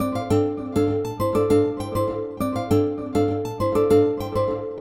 A picked Nylon String sequence. A little more chords in this one.
100bpm, loop, melodic, picked-nylon, sequence